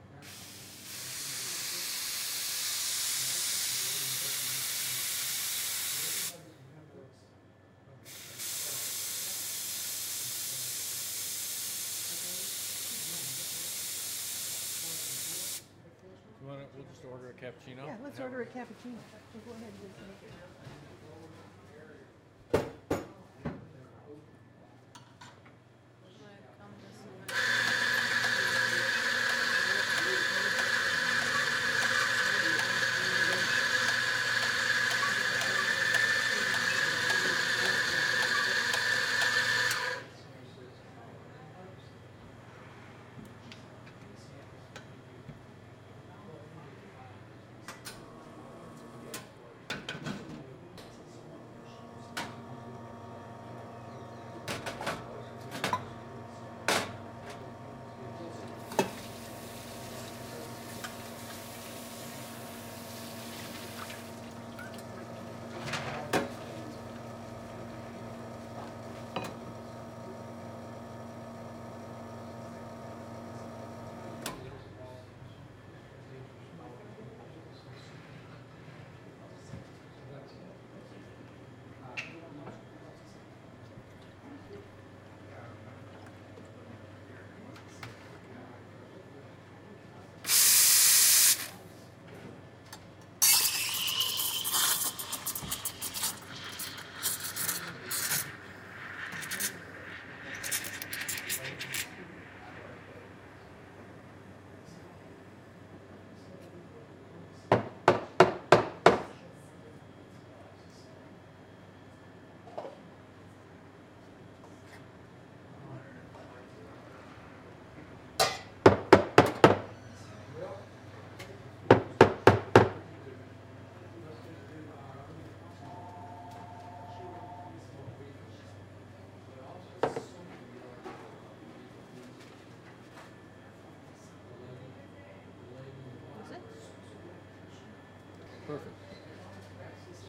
Audio of a barista making a cappuccino from start to finish. Recorded via boom mic in a small coffee shop in Charlotte NC.